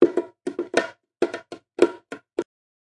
JV bongo loops for ya 2!
Some natural room ambiance miking, some Lo-fi bongos, dynamic or condenser mics, all for your enjoyment and working pleasure.